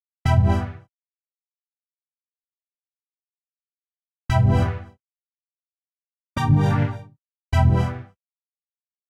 TechDub Stabs
tight-as lovely stabs that sound rad and forboding